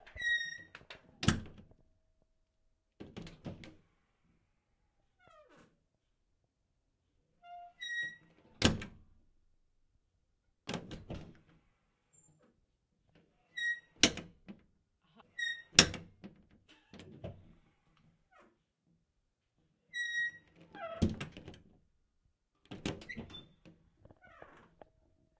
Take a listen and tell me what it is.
zavírání a otevírání dveří - opening and closing doors
This noises are from pub
close, door, doors, flat, open